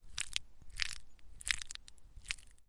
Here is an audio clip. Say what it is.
noodles - stir 01
Stirring noodles in a ceramic bowl with a metal spoon.
noodles, noodle, food, spoon, metal-spoon, bowl, ceramic-bowl